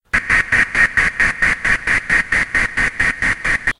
Steam train (sythesized) 02
Original track has been recorded by Sony IC Recorder and it has been edited in Audacity by this effects: Paulstretch, Tremolo and Change tempo/pitch.
railroad, rail-way, locomotive, historic-locomotive, rail-road, historic, steam-train, steam-locomotive